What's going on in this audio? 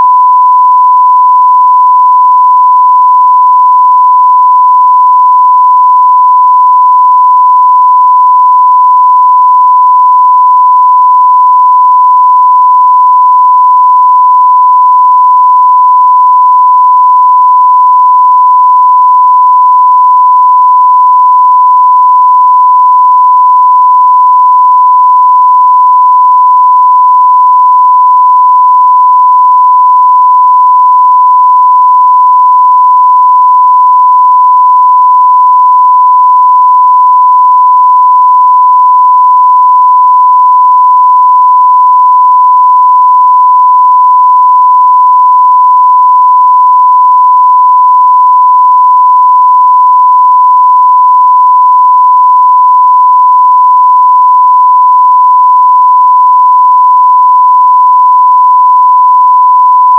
1kHz (1 minute)
One killer hurts. For a minute (or 60 seconds). For if someone is swearing A LOT or for a TV test pattern.
SMPTE colour bars- typical test pattern/testcard.
Well at least I finally got round HTML...
1kHz, beep, bleep, censor, censorship, explicit, foulmouthery, sine, swearing, testcard, test-pattern, tone, tv